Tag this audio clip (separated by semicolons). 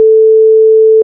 440hz; tone